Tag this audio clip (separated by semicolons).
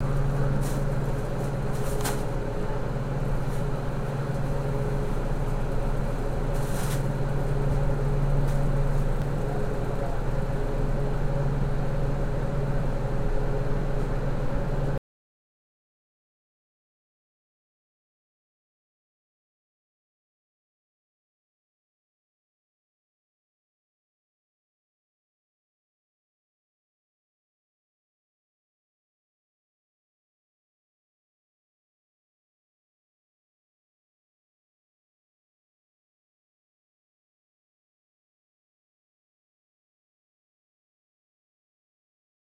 freezer; fridge; refrigerator